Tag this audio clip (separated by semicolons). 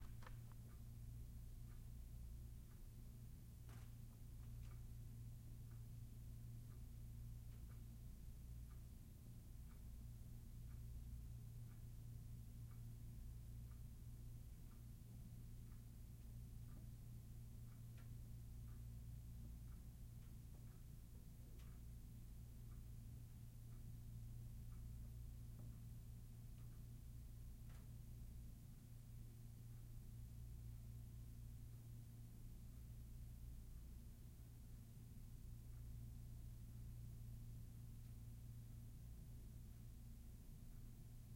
indoor; ambience